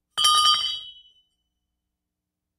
metal pipe 3
Making noise with a 2in galvanized metal pipe - cut to about 2 ft long.
Foley sound effect.
AKG condenser microphone M-Audio Delta AP
effect, foley, pipe, soundeffect